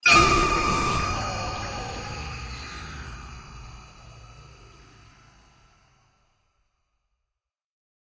This sample uses low-rate sampling effects on periodic signals with other effects to render an "explosive" sound.
clash, flash, hit, impact